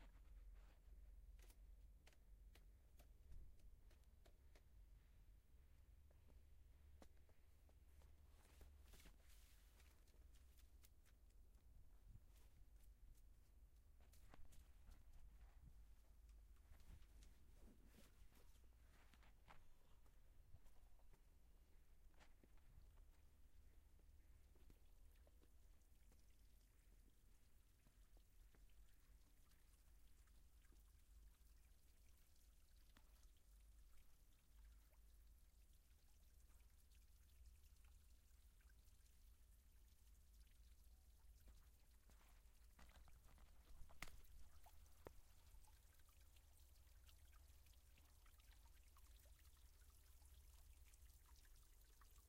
bater vegetaçao 01
Porto, park, natural, water, vegetation, ulp-cam, serralves